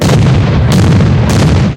explosion near
Made with fireworks
explosion, fire-works, flak, artillery, boom, bang, fireworks, wide, destroy, firework, long